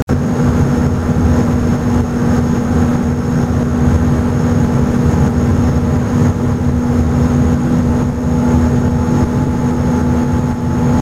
On board the car ferry crossing The Clyde in Scotland